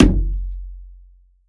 WATERKICK FOLEY - HARM 07
Bass drum made of layering the sound of finger-punching the water in bathtub and the wall of the bathtub, enhanced with harmonic sub-bass.
bassdrum, foley, kick, percussion